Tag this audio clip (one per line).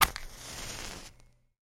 ambient
misc
noise